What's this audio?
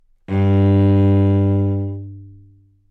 Part of the Good-sounds dataset of monophonic instrumental sounds.
instrument::cello
note::G
octave::2
midi note::31
good-sounds-id::4578
cello, good-sounds, single-note